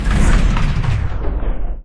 Slowdown sound effect originally created by Benboncan shortened. This is the slow down version.